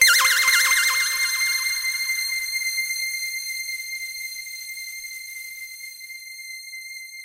Regular Game Sounds 1
You may use these sounds freely if
you think they're usefull.
I made them in Nanostudio with the Eden's synths
mostly one instrument (the Eden) multiple notes some effect
(hall i believe) sometimes and here and then multi
intstruments.
(they are very easy to make in nanostudio (=Freeware!))
I edited the mixdown afterwards with oceanaudio,
used a normalise effect for maximum DB.
If you want to use them for any production or whatever
20-02-2014